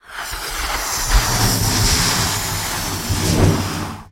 Balloon - Inflate 04
Air, Balloon, Gas, Pressure